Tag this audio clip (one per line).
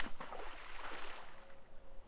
nature
splash
water